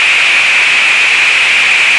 Doepfer A-118 White Noise through an A-108 VCF8 using the band-pass out.
Audio level: 4.5
Emphasis/Resonance: 9
Frequency: around 2.5kHz
Recorded using a RME Babyface and Cubase 6.5.
I tried to cut seemless loops.
It's always nice to hear what projects you use these sounds for.